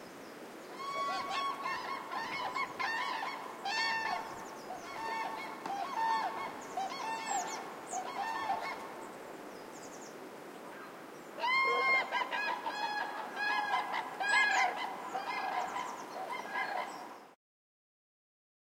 I heard and saw cranes at Målsjön in Kristdala,Sweden,it`s a bird-lake.
I did some recordings in 2nd of april.
microphones two CM3 from Line Audio
And windshields from rycote.
ambiance, bird, bird-lake, bird-sea, crane, crane-dance, cranes, field-recording, flying, general-noise, nature, soundscape, wings